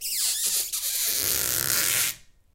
Squeaks made by running a finger across a stretched plastic grocery bag